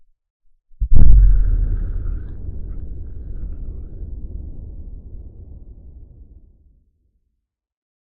Sound of a far off explosion created by distorting, reverbing and layering sounds of a dustbin being dropped.
Recorded with Samson Meteor Mic.
far, shockwave, boom, OWI, explosion